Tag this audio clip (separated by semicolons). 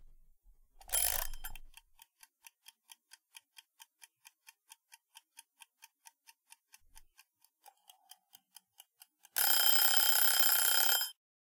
kitchen; OWI; timer